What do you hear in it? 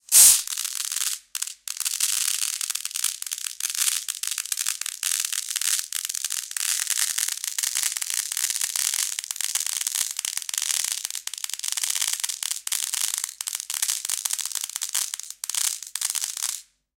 strange sound nails on a plexi plate
Strange sound made with small nails falling slowly on a plate of Plexiglas. Crackling.
crackling, fizzy, strange